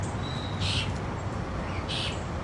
Quiet calls from a Golden-breasted Starling. Recorded with a Zoom H2.